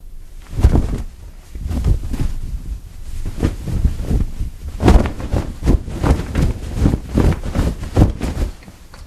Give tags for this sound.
cloth; towel; shake

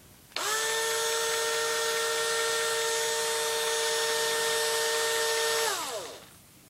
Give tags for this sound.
machine; motor; drill; screwdriver; mechanical; electric; tool; buzz; whir

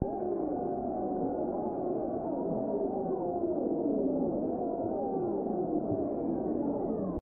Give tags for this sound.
angry-mob; unchaz

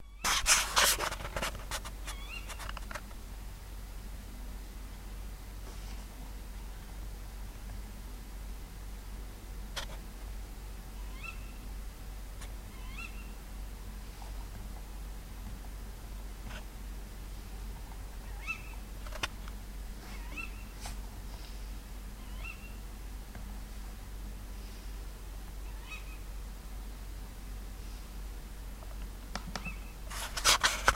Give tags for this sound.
from; bird; forest; unknown; dragnoise

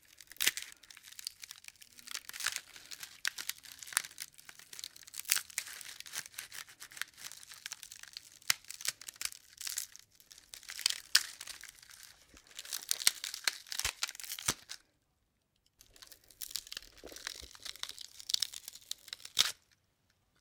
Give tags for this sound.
snap; wood

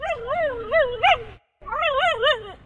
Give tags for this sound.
dog; dogs; barking; bark; whine; howl; canine

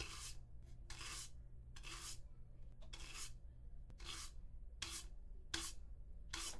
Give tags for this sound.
broom; foley; sweeping

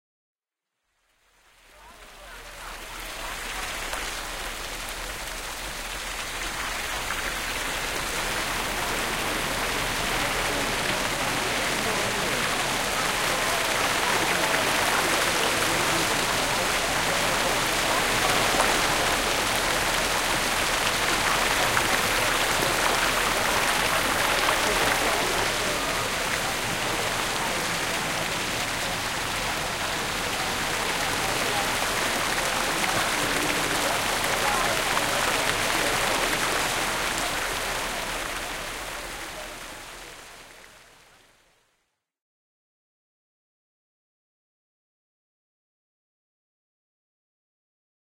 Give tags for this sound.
water; competition; fountain; restaurant

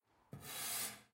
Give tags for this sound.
metal; scrape; steel

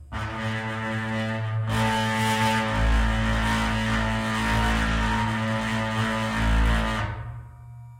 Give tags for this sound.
engine; motor

